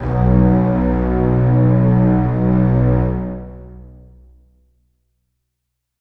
Contrabasses Foghorn Rumble
Used the Contrabasses soundfont from Musescore 2.0 for a haunted house ambient track. Sounds like a foghorn, typical in suspenseful scenes in movies.
Horror, Ambient, Strings, Foghorn, Cinematic, Rumble, Suspense